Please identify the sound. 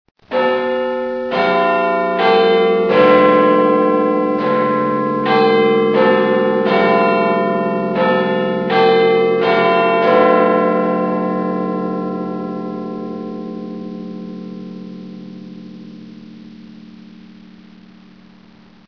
Big Bin 3Quarter
3quarter, big, ben